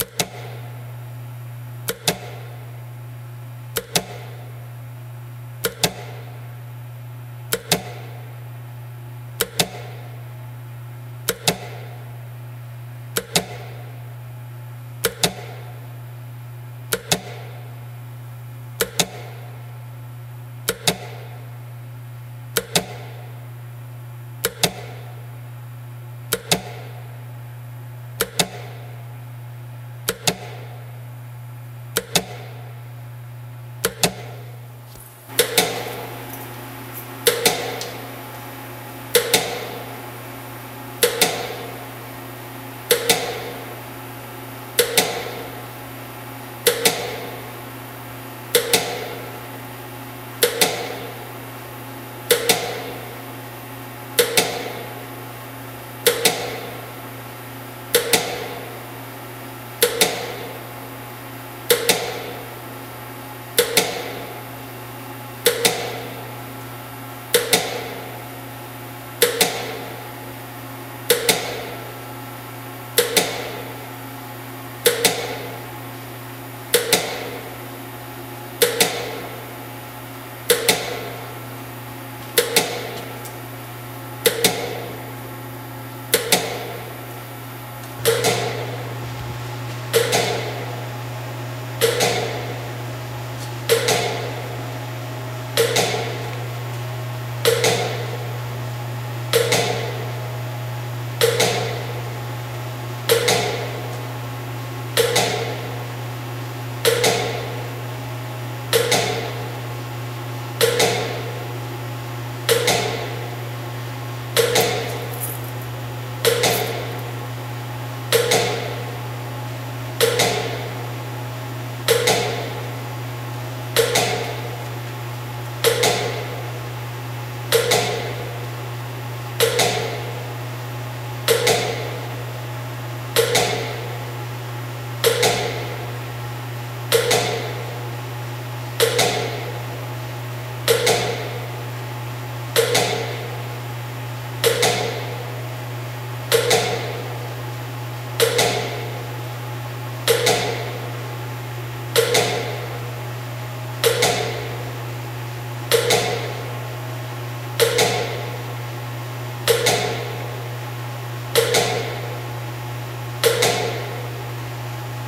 Transfer Room Pump (Loud, Clicky, Reverb, Noisy, Heavy)
A short recording of a pump at a water treatment plant. Kind of a cool, loud, regular popping sound (albeit noisy). Recorded on an iphone mic with the Rode Reporter app. Recordings are close, medium, and far distance.
ambience, chunk, click, field-recording, hum, industrial, machine, machinery, mechanical, noise, pop, pump, reverb